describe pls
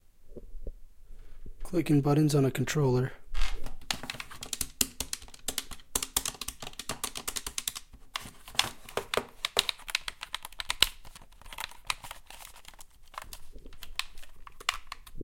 Mashing Controller buttons
Mashing video game controller with a shotgun mic.